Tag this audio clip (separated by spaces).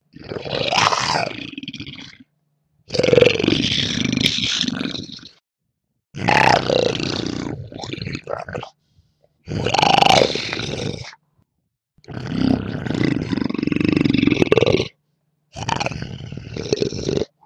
monster beast growl hound animal snarl